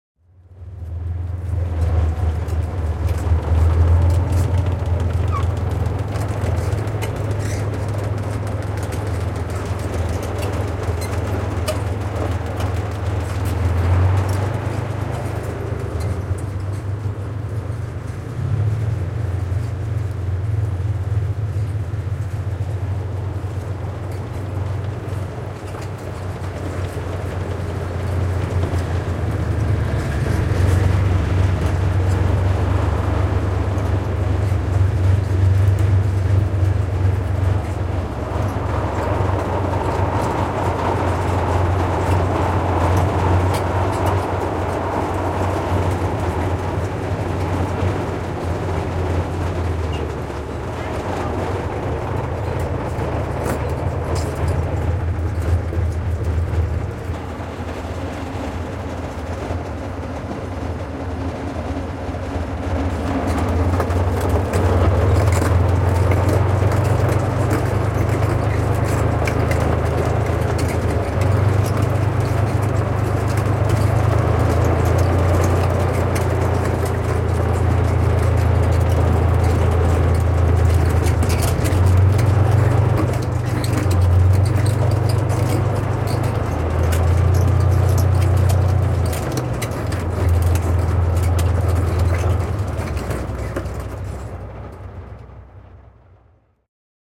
Panssarivaunut maastossa / Tanks on terrain, tracks creaking
Panssarivaunut kulkevat maastossa, telaketjut kitisevät.
Paikka/Place: Suomi / Finland
Aika/Date: 31.10.1984